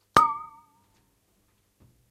samples in this pack are "percussion"-hits i recorded in a free session, recorded with the built-in mic of the powerbook
bottle, noise, pong, metal, boing, water, ping